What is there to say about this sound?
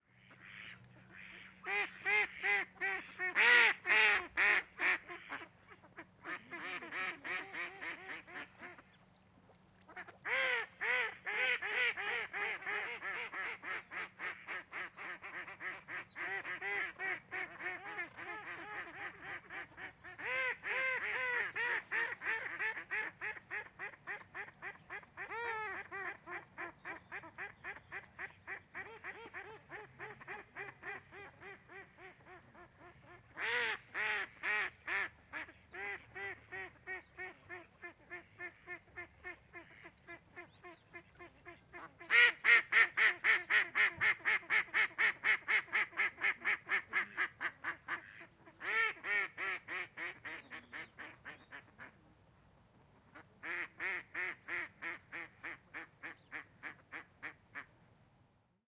Canards andernos 2014 3
Des canards enregistrés dans le bassin d'Arcachon.
Some ducks I recorded in the South of France (near Bordeaux).